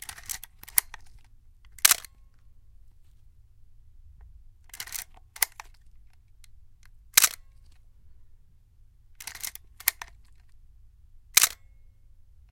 camera shutter and rewind notch compressor
A remix of a camera shutter sound. This version has a deep notch filter
to get rid of the annoying ping sound after the shutter, and some
compression to make it sound harder.
camera, click, compression, environmental-sounds-research, notch, rewind, shutter